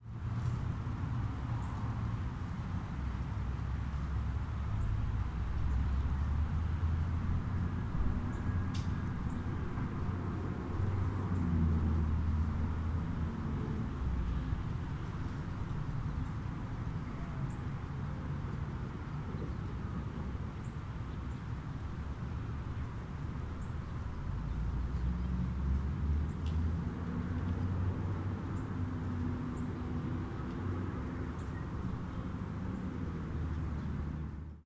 Field recording of a neighborhood street during the day.